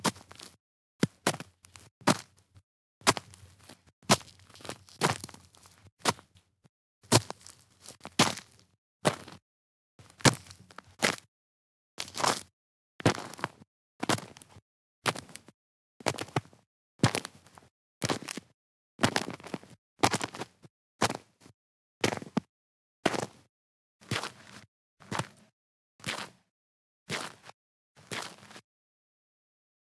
Short Length Walk Snow
Short/hasty walking on a gravel path with little, fresh but cleared Snow, close mic.
Recorded on a Zoom H2 with internal Microphone, slightly Processed with EQ and Compression for closer feel, Compiled from Long Recording.
Footsteps, Outdoors, CloseUp, Field-Recording, Crunch, Snow, Crunchy, Ice, Outside, Walking